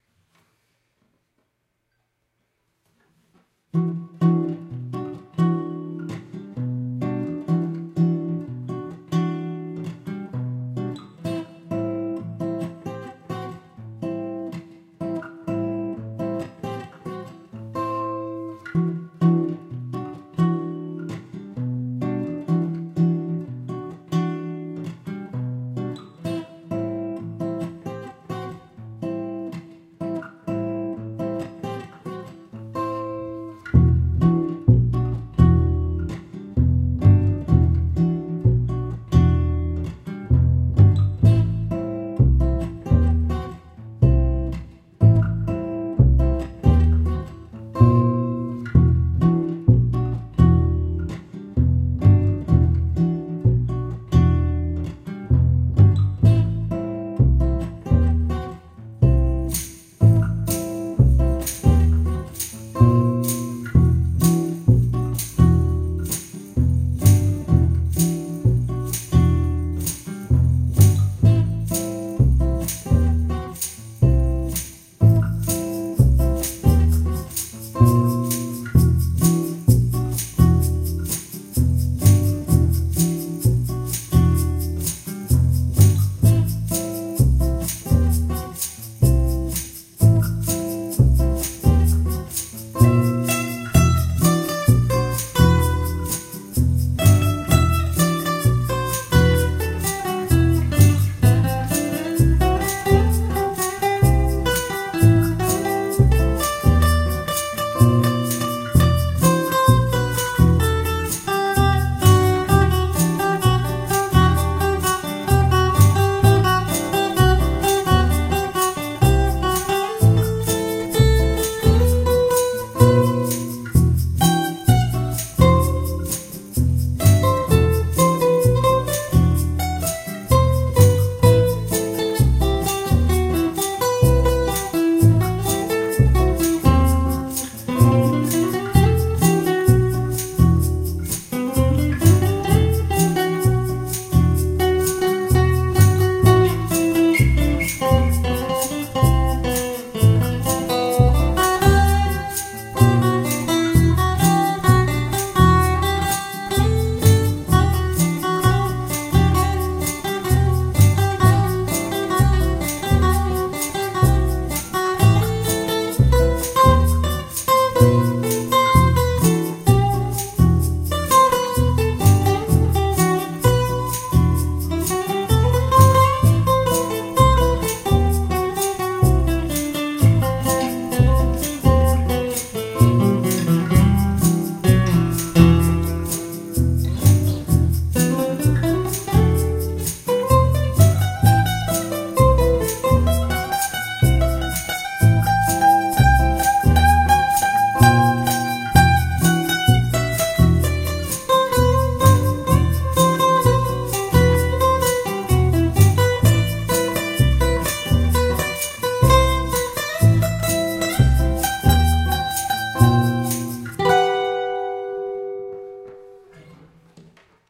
Acoustic performance in Ableton Live
This is acoustic performace with Ableton live, where i using acoustic guitar, Double bass, tmbourine and shaker like percussion. Melodic and sentimental music, like bossa nova style.
tempo - 128 bpm.
Key - Em.
sound, instrumetal, percussion, music, Abletonlive, chords, song, solo, double-bass, cool, acoustic-guitar, echo, Jam, improvisation, bossanova, loop, beautiful